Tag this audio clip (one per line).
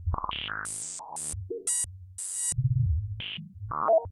alesis-philtre
bloop
chimera-bc8
crackle
synth